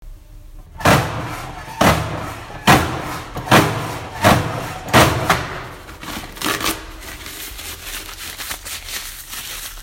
Cleaning my hands with paper